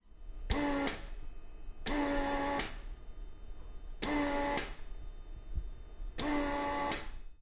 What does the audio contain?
This is the sound of a workstation of the BERG group which contains three different welders. It is used to craft electrical hardware for biomedical projects.